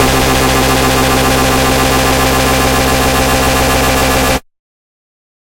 45-16th Dubstep Bass c3
Dubstep Bass: 110 BPM wobble at 1/16th note, half of the samples as a sine LFO and saw LFO descending. Sampled in Ableton using massive, compression using PSP Compressor2. Random presets with LFO settings on key parts, and very little other effects used, mostly so this sample can be re-sampled. 110 BPM so it can be pitched up which is usually better then having to pitch samples down.
synthesizer, bass, dubstep, electronic, porn-core, lfo, rave, trance, loop, processed, techno, dance, noise, bpm, club, 110, synth, sound, dub-step, electro, wah, wub, dub, sub, beat, Skrillex, wobble, effect